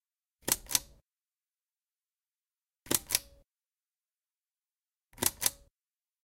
Brownie Hawkeye Camera Shutter
Here is a nice Camera shutter of a Brownie Hawkeye
Click, Release, shutter, Button, film, Camera, Press, Machine